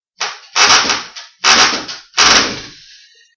Here is a sound created by my uncles battery powered drill while he was remodeling our kitchen. Also don't forget to checkout all of the sounds in the pack.